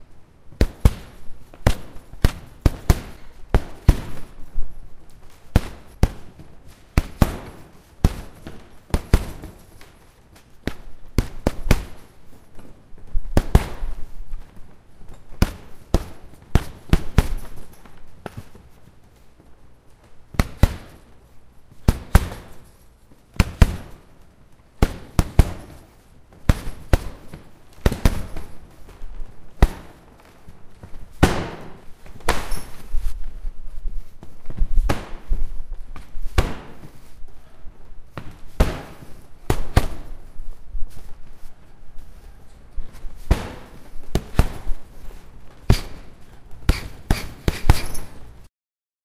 CLARKS Punch Hits body blows around the room
natural sounding bassy body blows and falls.
hit, impact, punch, thud